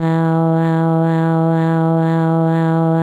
aoaoaoaoaoa 52 E2 Bcl
vocal formants pitched under Simplesong a macintosh software and using the princess voice
synthetic, formants, voice, vocal